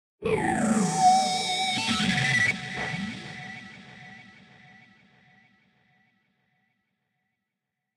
distorted music box 3
The sound of a tortured music-box. Made from a simple music-box recording with added distortion and effects. Part of the Distorted music-box pack.
atmosphere, distortion, electro, electronic, music, music-box, noise, processed, rhythmic, synth